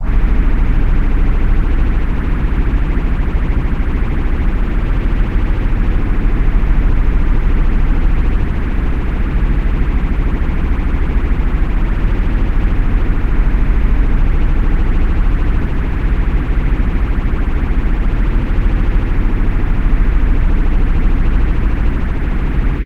granular ambience 2 boat
Emulation of a boat engine complete with resonance of a boat. Add some wave splashing and this is the ultimate fake boat engine sound around. Created entirely in granulab.
ambient, granular, free, artificial, sound, stereo, sample, synthesis